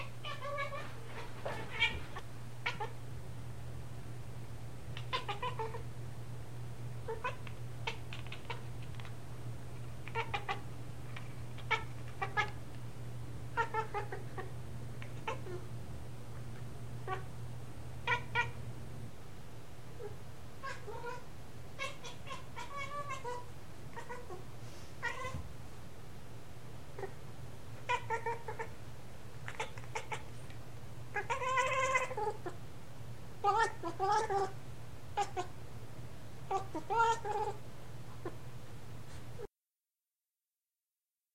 One of the cats we're looking after sings when he plays with the cat toy. This recording has been edited with Audacity (on the Mac) but the only alteration I made was the removal of some "dead air." Recorded on an iPod using a Micromemo and Sound Professional stereo mike.